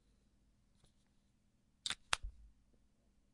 Sound of closing a highlighter. Recorded with a Neumann KMi 84 and a Fostex FR2.